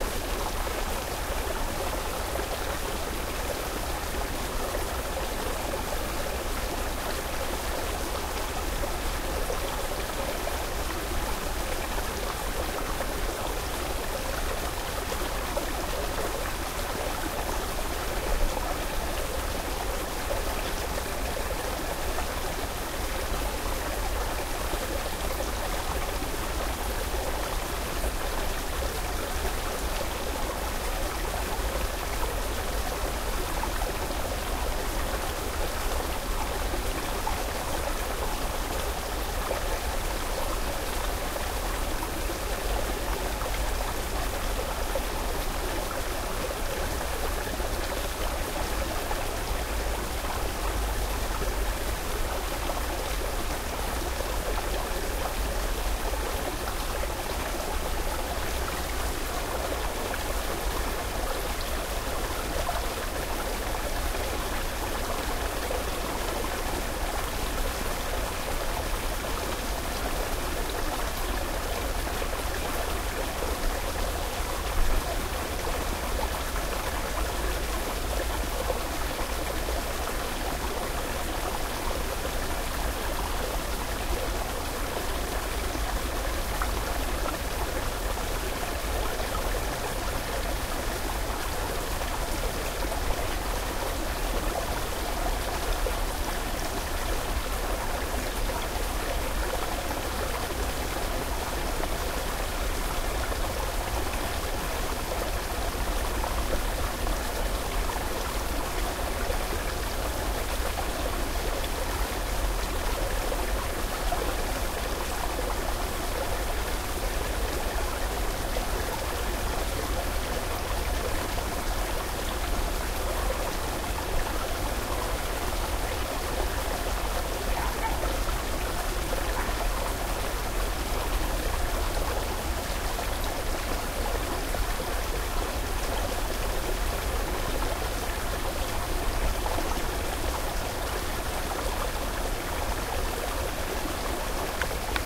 Just a waterfall
stream, flow